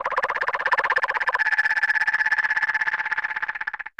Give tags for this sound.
noise; short

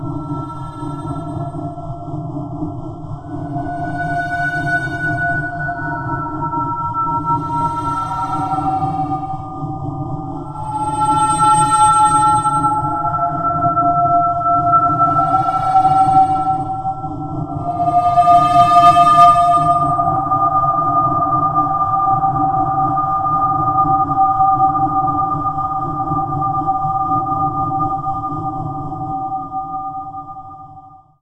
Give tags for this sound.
Cellar; Nightmare; Ambiance; Entrance; Sound; Free; Atmosphere; Maker; Halloween; Horror; Scary; Engine; Light; Evil; No; Drone; Creature; Ghost; Hall; Ambient; Spooky; Ambience; Creepy; Dark; Shadow

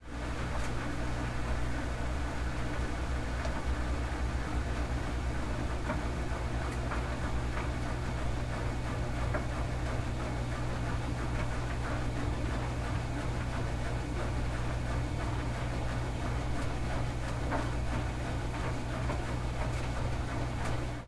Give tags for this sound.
washing; machine; laundry

